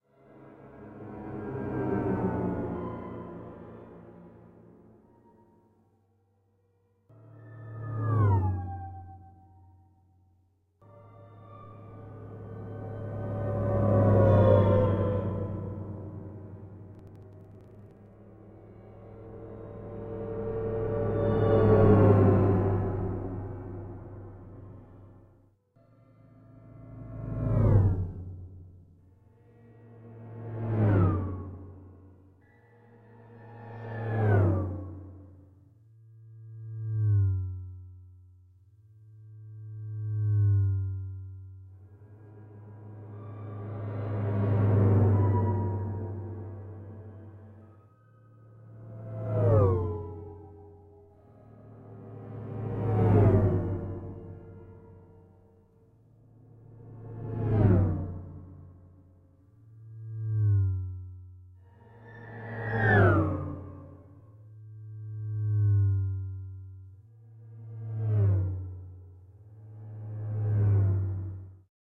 piano dopplers
Doppler effect with clustered piano-sounds. This gives quit some futuristic drive-by sound effects for, for example, space-ship flying.
doppler, fx, passing, piano, processed, racing